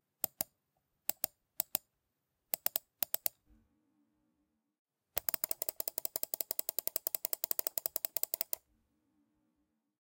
Mouse, variety of clicking
Different speeds of clicking the mouse button.
computer, mouse